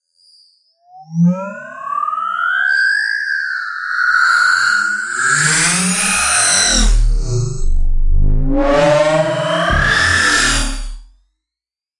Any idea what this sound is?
TURN A QUARK INSIDE-OUT! Outer world sound effect produced using the excellent 'KtGranulator' vst effect by Koen of smartelectronix.